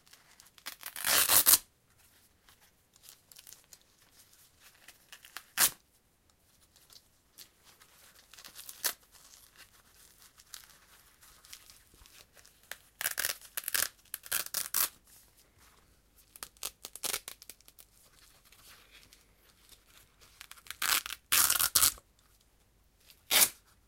velcro Fastener
Sound of velcroFastener. I tryed record various noises with it. Recorded with Zoom H1 internal mic.
noise, shoes, velcro-Fastener